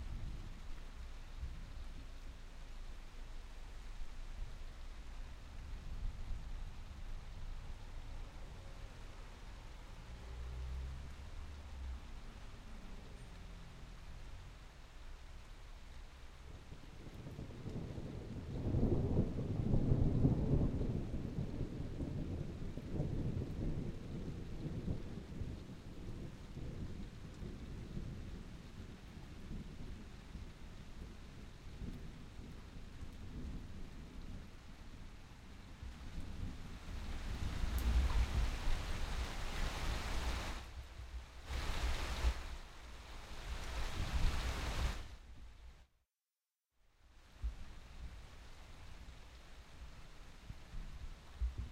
Thunder&Rain1
Big storm in West Yorkshire, England. Recorded on a Rode Condenser Microphone using a Fostex Field Recorder. NO AUTO GAIN!